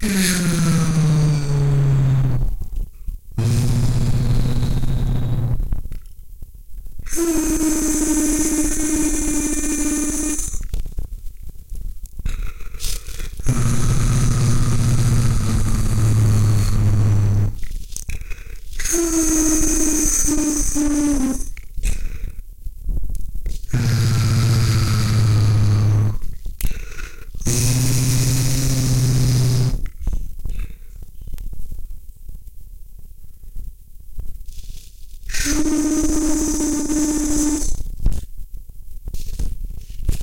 alien ship 01
the edited sounds of myself making noises into a microphone for an alien spaceshi
spaceship, vocal